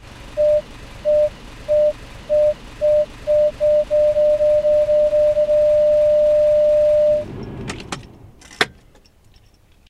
CRUMIERE Robin 2019 2020 CarReversingToPark
This sound was made on Audacity. I wanted to make a sound like if you were parking your car in reverse. First, I generated a 600 Hz sinusoidal wave and thanks to various quick cuts, fade-in and fade-out effects, I recreated the sound of the reverse signal heard from the interior. I also reduced its volume by 9dB.
Then I used a running car engine and repeated it while the alarm is on. To understand that the car is now parked, I added at the end the sound of a key removed from its place. Thanks to the cut function and some "fade-in" and "fade-out" effects, I managed to make the final sound softer to listen.
reverse, car, alarm, reversealarm, parking, parkingalarm